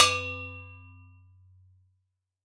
Tube hit 05, mid+ringing
A real industrial sound created by hitting of a metal tube with different elements (files, rods, pieces of wood) resulting in more frequency range of these hits.
Recorded with Tascam DR 22WL and tripod.
percussive, ting